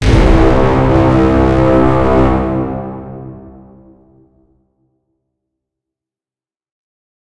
My take on the epic and ominous orchestral "BRRRRRRRRRM" sound often found in movie trailers, such as Inception, Shutter Island and Prometheus. I've nicknamed it the 'Angry Boat'.
This is Angry Boat sound 7, the most epically angry of the pack. I've added a distorted kick drum to the start of Angry Boat 3 and distorted the strings even more, which makes it sound like it's all emanating from an explosion.
Made with Mixcraft.